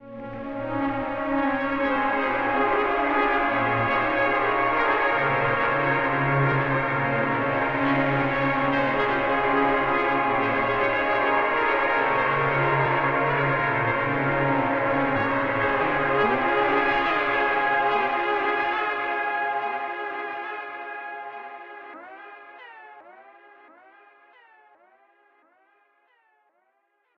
warm fanfares. ambient trumpets.